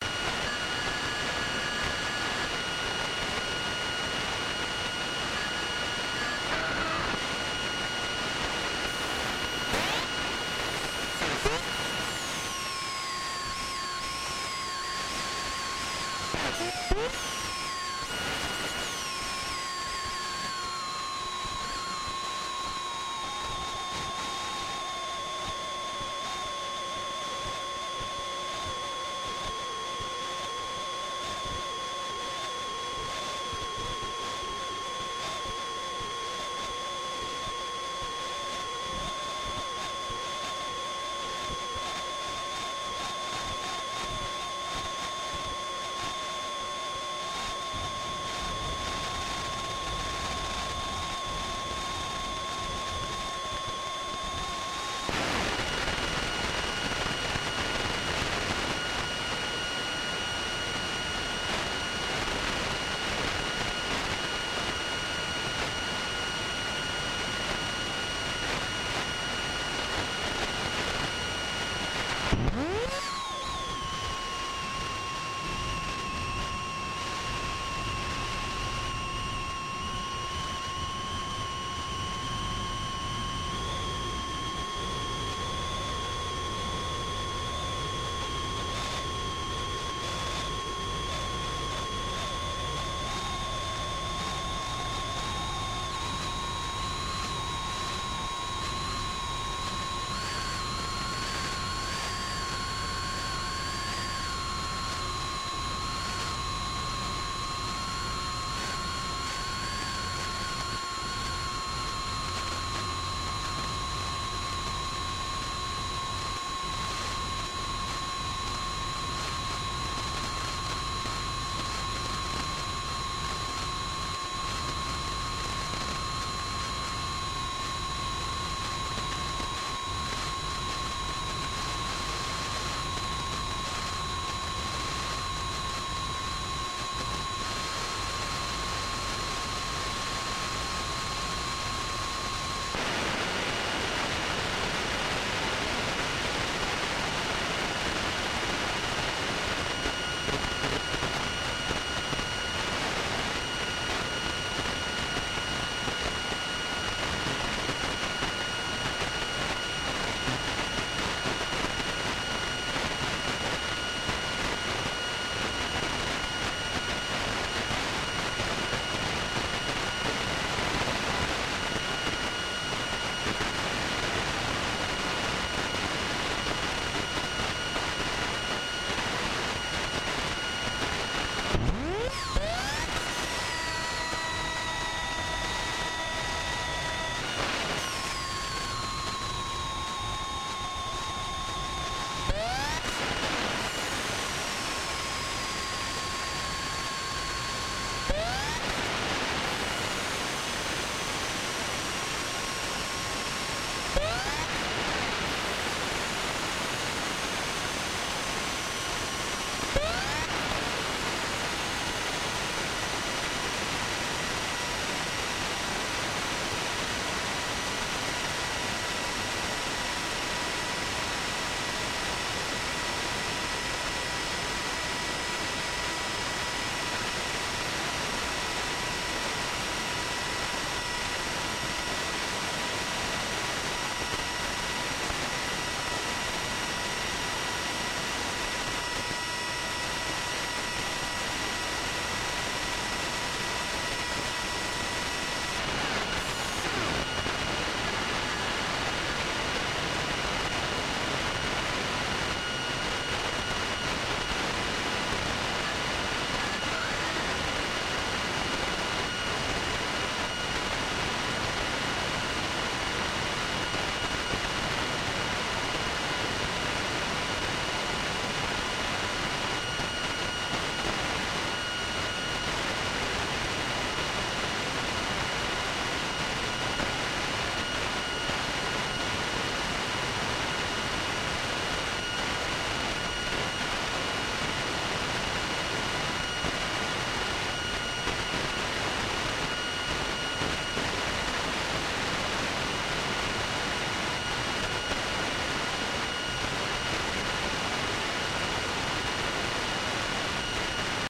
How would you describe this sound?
Computer CD player open play AM radio
Recorded from line-in from a 13-year-old boombox tuned to the bottom of the AM dial and placed near the computer. You can hear the cd-player starting up, working, stopping, working again. At one point I skip through a track with Winamp creating a choppy sound. When the cd player stops you can hear the base computer noise.